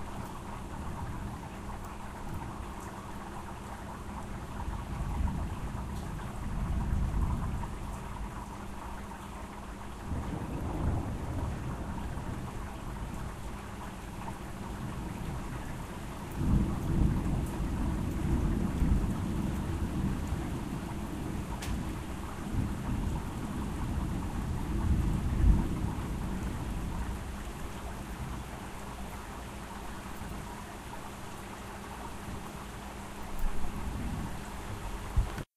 Heavy Rain with lots of good rolling thunder.Distant Thunder.